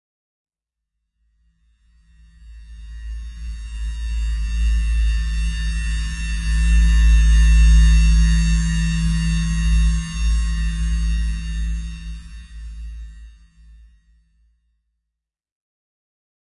Buzzing Cicadas
Pad sound with a higher pitched cicada-like buzz accompanied by a low rumble.